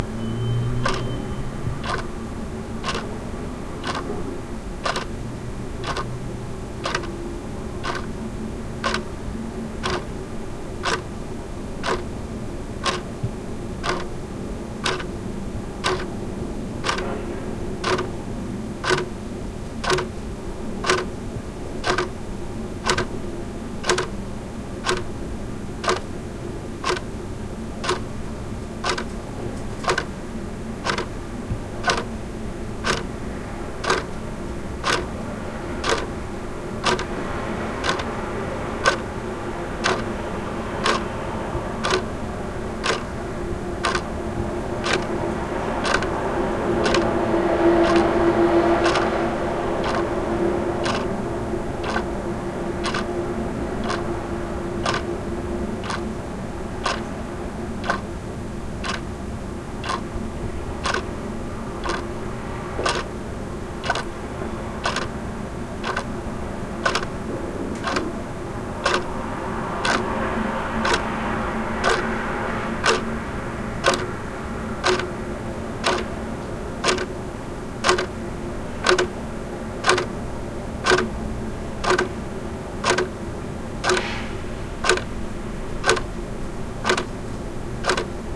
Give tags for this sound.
ticking
background
room
night
watch
noise
clock

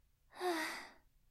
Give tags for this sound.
female
female-voice-sound-effect
girl
girl-sighing
sigh
sighing
sigh-sound
vocal
voice
voice-actor
woman

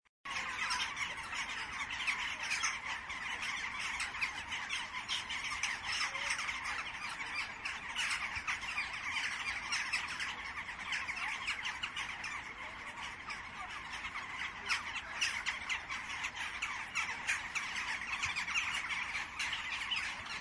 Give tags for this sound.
birds
jackdaw
crow

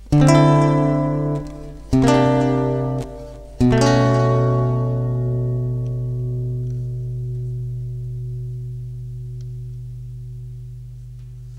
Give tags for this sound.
chord
guitar
nylon
seventh

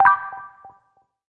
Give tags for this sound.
accept
app
beep
button
Game
giu
hud
menu
positive
press
ui

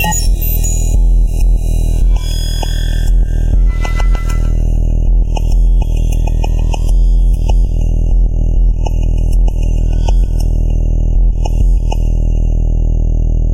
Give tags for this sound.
digital-dub
experimental
industrial
spectral
loop
grinding

